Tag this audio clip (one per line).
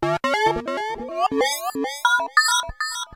acid
alesis
ambient
base
bass
beats
chords
electro
glitch
idm
kat
leftfield
micron
small
synth
thumb